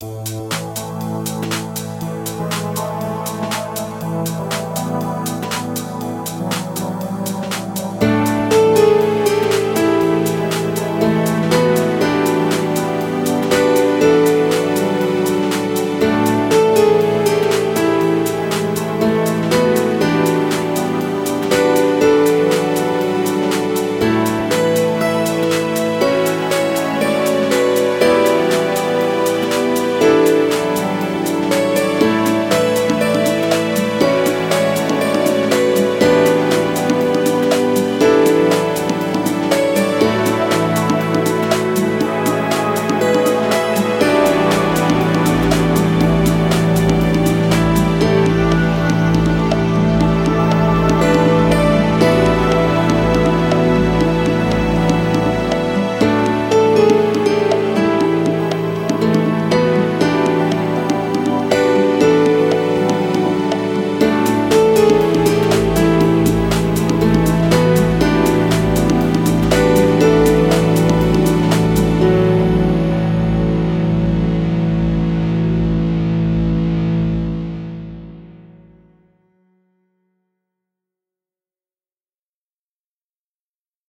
Space Syndrome
A short, funny and weird musical theme, hope you can make use of it :)
cartoon, film, funny, game, loop, movie, music, soundtrack